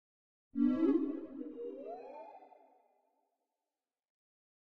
odd pulse 1
An odd game sound/pulse.
game, ping, pulse